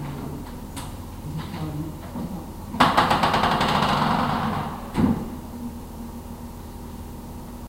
Mono recording of a door creaking in the abandoned building downstairs. Recorded at high gain with an AKG D-65 mic shoved against a gap between wall and floor. Creepy.